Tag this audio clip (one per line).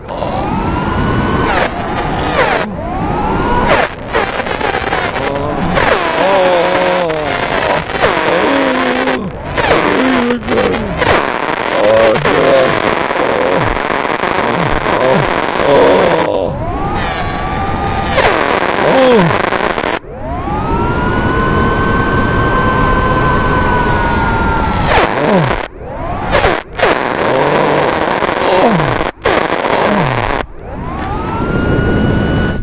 dental
dentist
gargling
pain
teeth
tooth